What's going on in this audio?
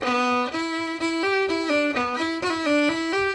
fiddle melody 02
even more fiddle
fiddle, field-recording, melody